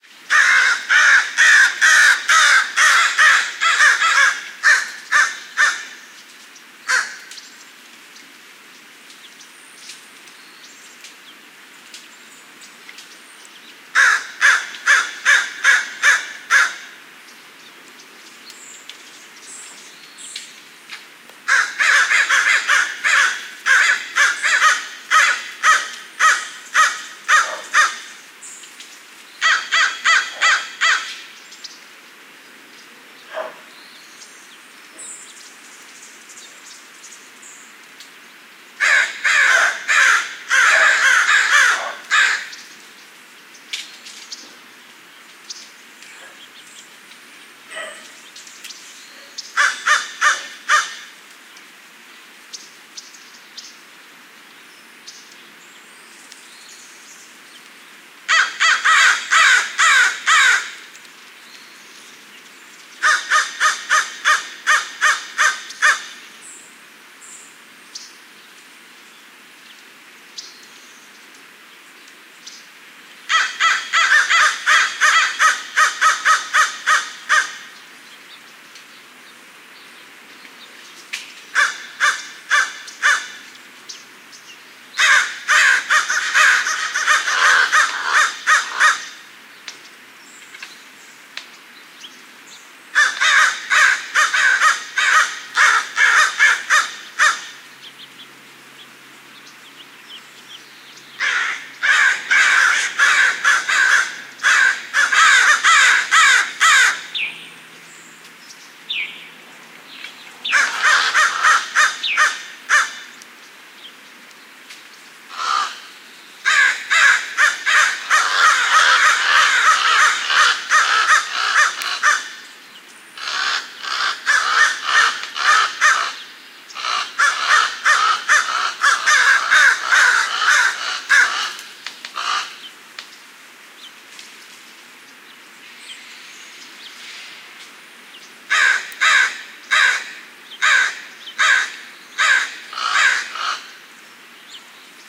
ANML M Ext Crow Calls Single1
More crows in my Los Angeles neighborhood.
Recorded with: Sanken CS-1e, Sound Devices 702t
birds, city, crow, field-recording, wildlife